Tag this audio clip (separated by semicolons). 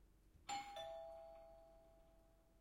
ding; doorbell